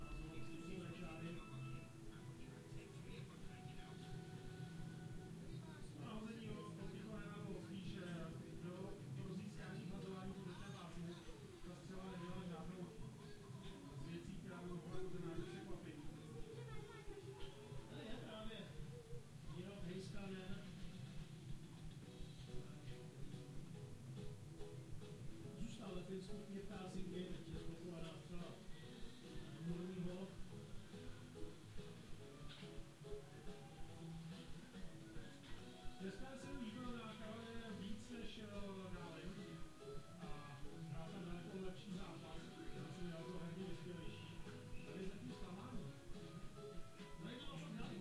Indistinct ambience of a TV playing in the background.
TV, ambient, television